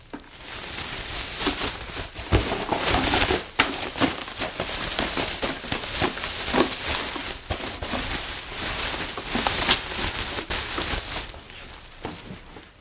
rustling empty garbage bag
The rustling of an empty garbage bag.